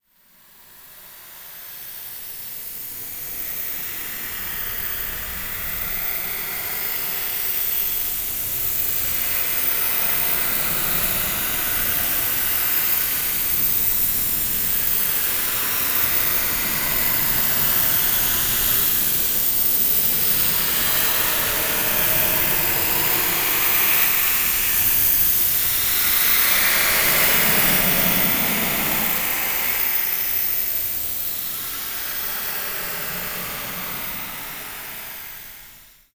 Phaser Effect 001
audacity, Effect, Phaser